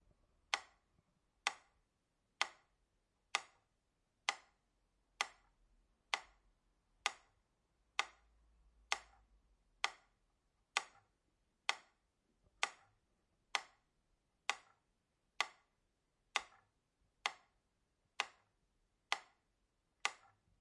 recordings of an antique clockwork metronome at different speeds
rhythm, clockwork, beat